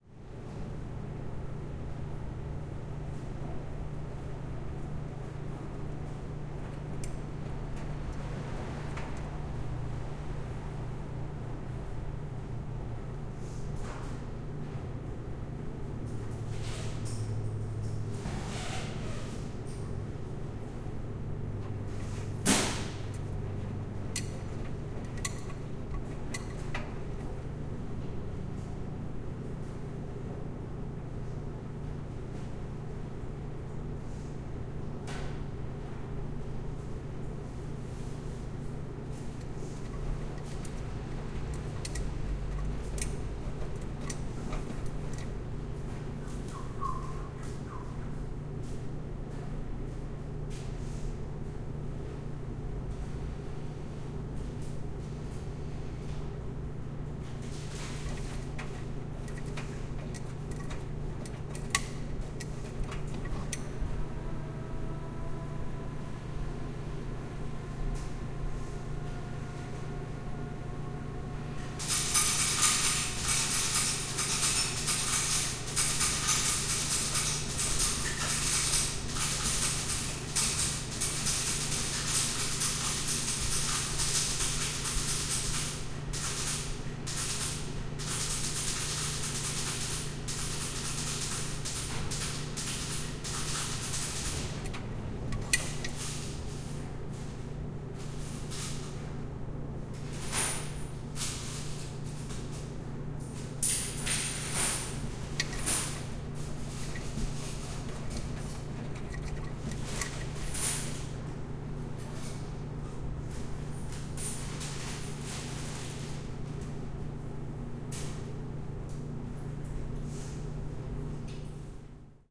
Laundromat ambience, a general BG ambience...coin machine sounds (at about :50 ) ...tinks are the sounds of clothes hitting machine door. Recorded to Microtrack.